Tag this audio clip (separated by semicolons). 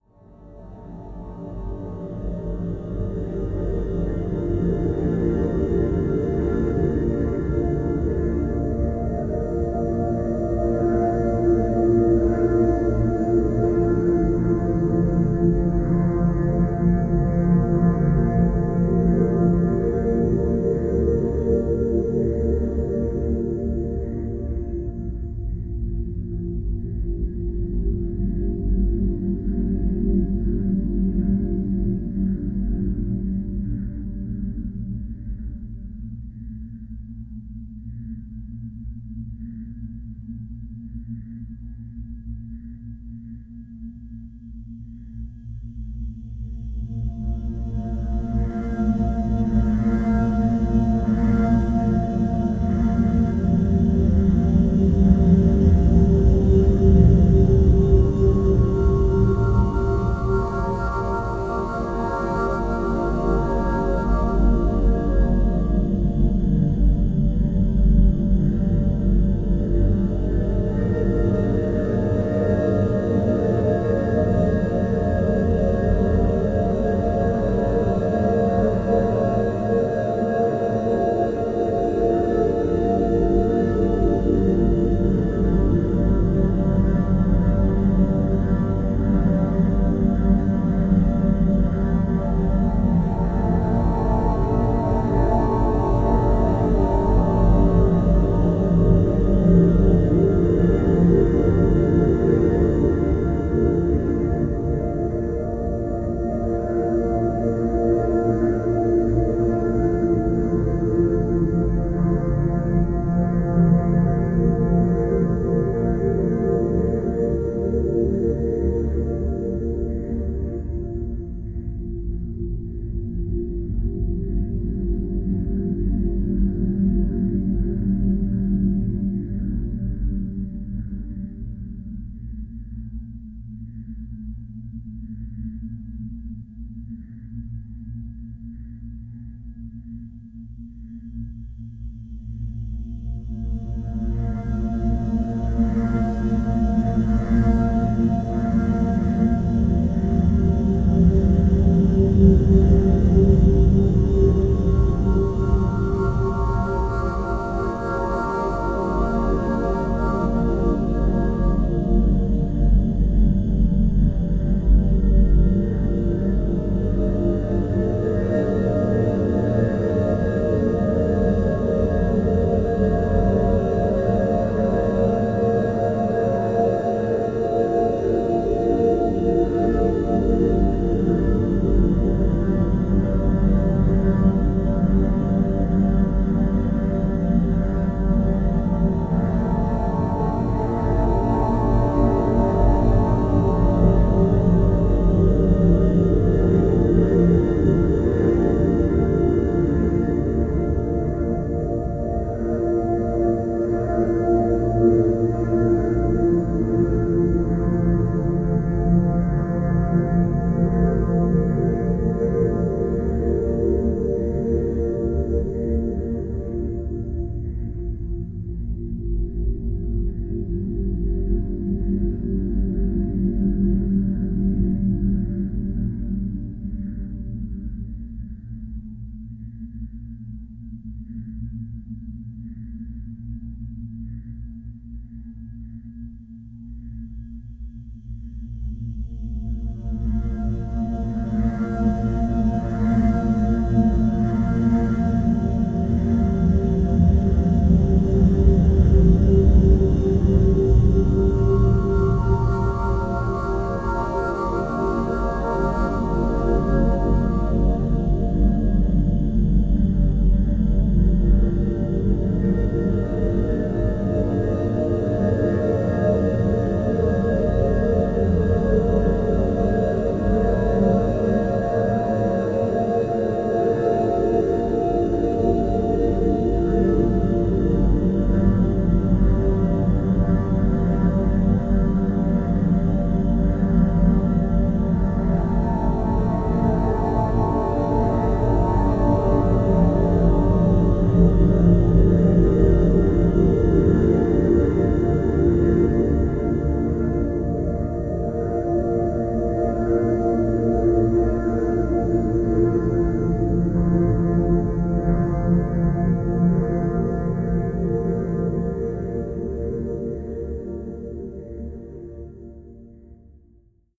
Experimental; Noise